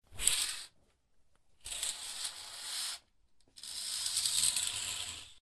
B4 curtain-opening
opening and closing the curtain inside a room
close, curtain, open